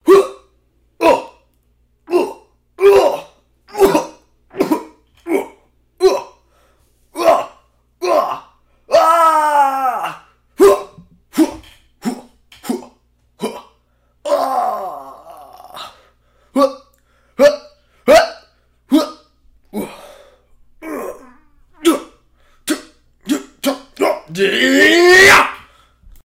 (Male) Grunts and Yells
hy-ya, male, yell, scream, yells, grunt, man, anime, grunts, oof